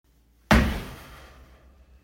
single foot stamp on wooden floor
A single foot stamp on a wooden floor
recorded with VoiceMemo on iphone 12 SE